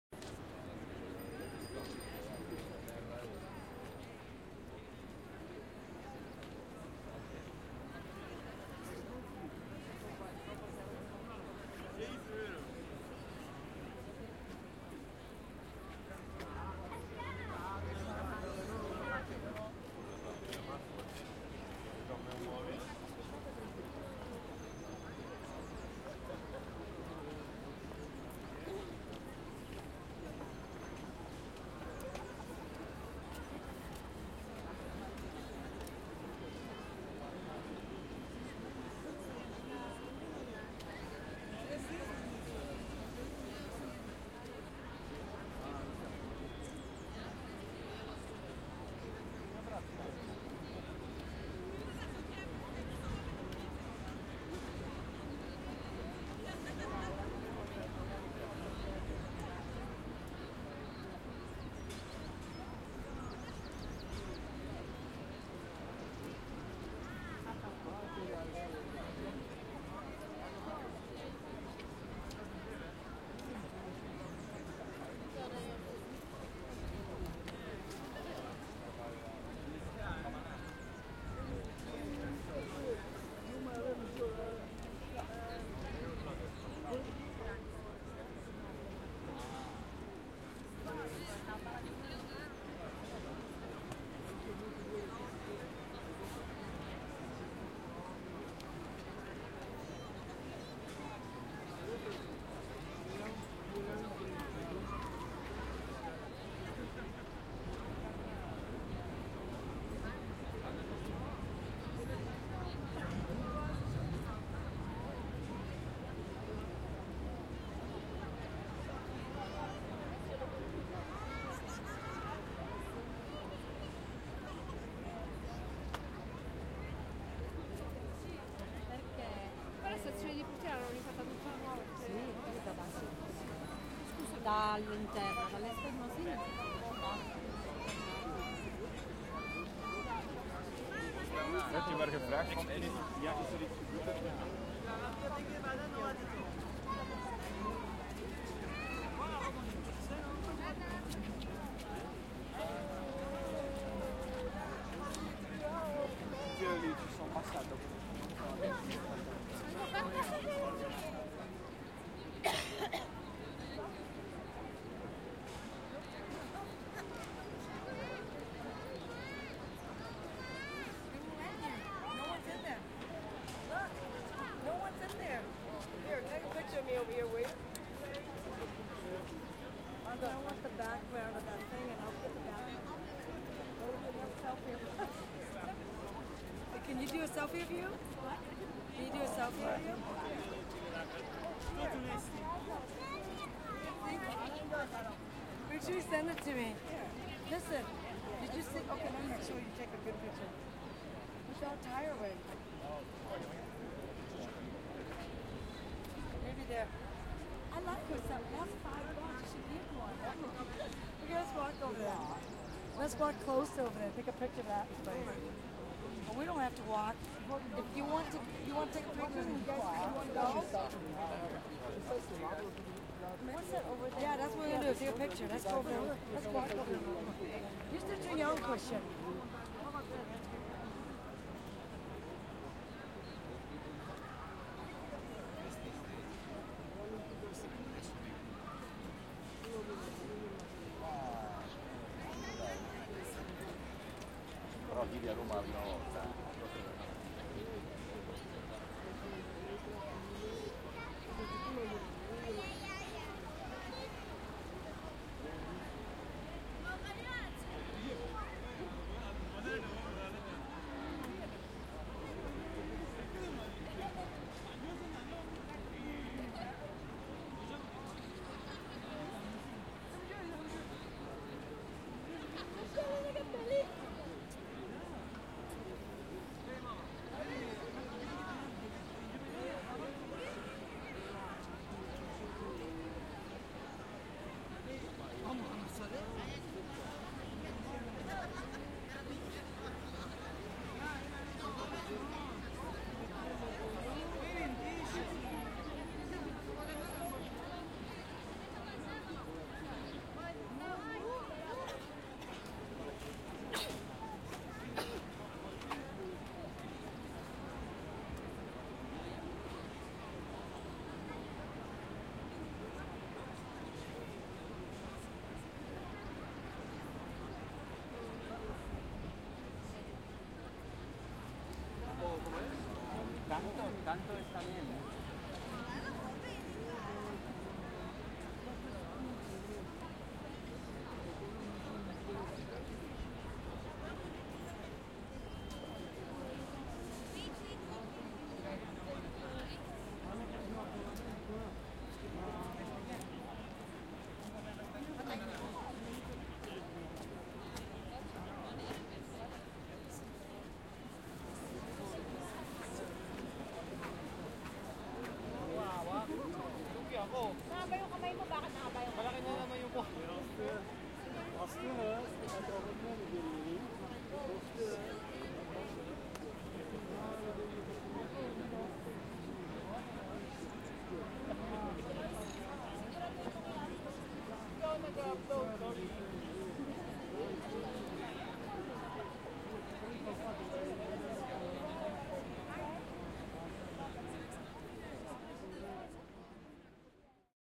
02 Rome, Colosseum Fori, birds, crowd, bikes, steps, wind Selection
Roma, Colosseo Fori, birds, crowd, bikes, steps, wind.
27/03/2016 01:00 pm
Tascam DR-40, AB convergent.
talk, colosseo, crowd, laughts, field-recording, birdsong, bird, people, roma, birds, spring, bikes, wind